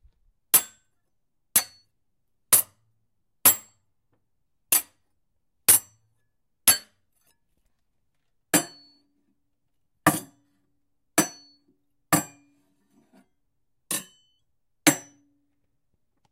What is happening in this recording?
Blade sounds on wood